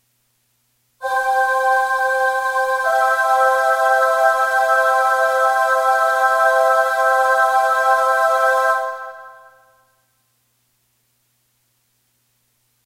DO YOU SEE THE LIGHT!?
I ask you...do you see the light?
If you need a little help this is the track for you!
An angelic choir from a Yamaha keyboard recorded with Audacity.
My seventh recording.
No acknowledgement necessary, I understand.
Thank you, have fun!